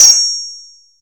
Techno/industrial drum sample, created with psindustrializer (physical modeling drum synth) in 2003.
industrial, percussion, metal, drum, synthetic